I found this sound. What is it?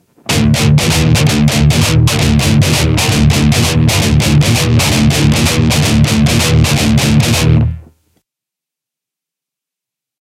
Metal Guitar Loops All but number 4 need to be trimmed in this pack. they are all 130 BPM 440 A with the low E dropped to D